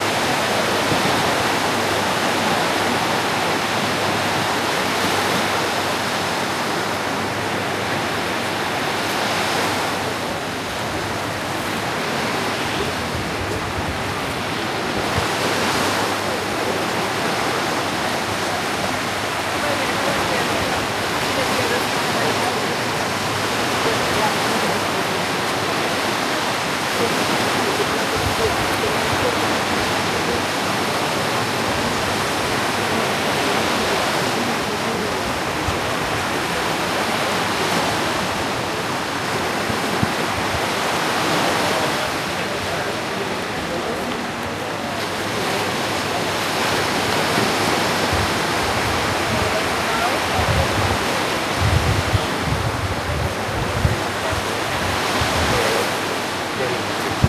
beach sea ocean waves with people
sea, people, ocean, waves, beach